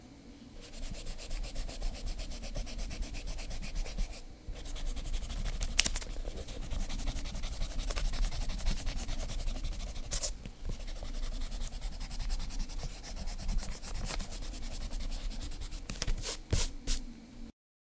erasing pencil marks with eraser